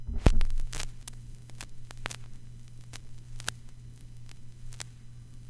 Record noise recorded in cool edit with ION USB turntable.
noise, vinyl, loop